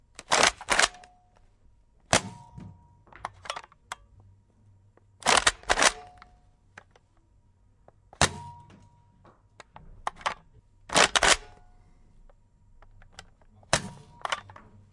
Nerf Roughcut Shot & Reloaded